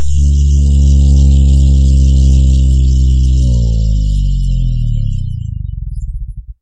So I know that fidget spinners have basically become a dead trend now, but I recently figured out that if you spin one really fast and put it to your ear, it sounds somewhat like an old plane's propeller, then, after a few seconds, you let it touch your ear slightly to let it slow down, and it sounds exactly like one of those propellers powering down. So I tried to record it the best I can. However, because my mic has a lot to live up to, it kind-of sounds too rough. Although, this sound could be used in some kind of classical war game or something like that.